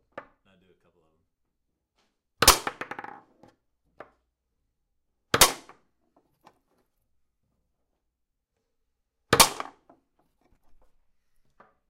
A forceful smack of a foosball against the piece of wood at the back of the goal.